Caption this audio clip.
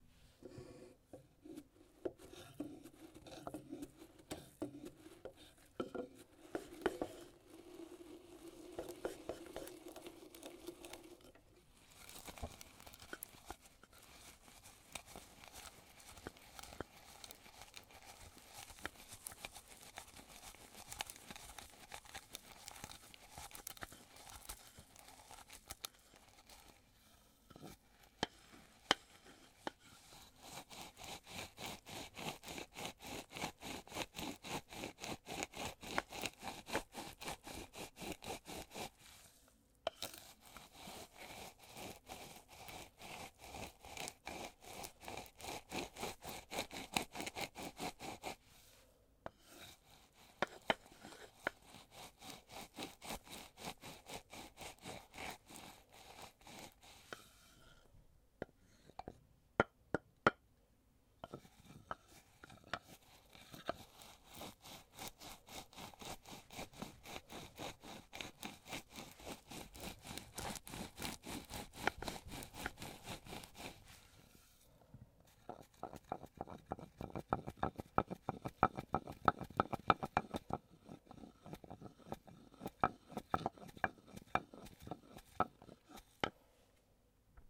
I used a Zoom H4n Pro capsule mic to record a wooden mortar and pestle. I filled it with bird seed (small seeds) and used a variety of techniques to grind the seed down. I also tapped the sides of the mortar with the pestle. This sound is in mono.